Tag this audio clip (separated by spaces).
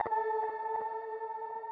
game sfx fx audio jungle effext sound vicces beat pc